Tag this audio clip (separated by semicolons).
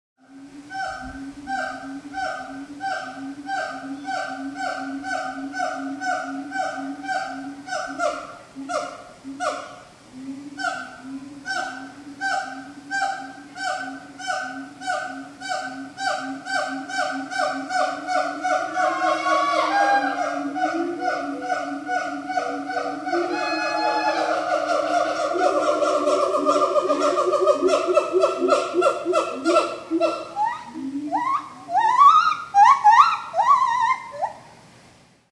ape; asia; exotic; field-recording; gibbon; island; jungle; monkey; primates; rainforest; siamang; tropical; waterfall; zoo